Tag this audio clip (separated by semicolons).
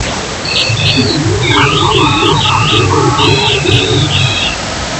generative; noise; sound-design